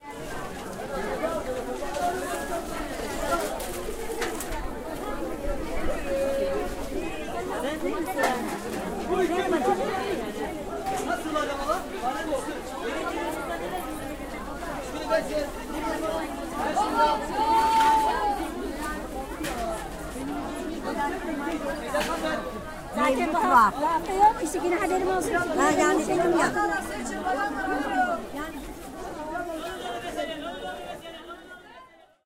S032 Voices in Bazaar Mono
General hum of people going about their business in a bazaar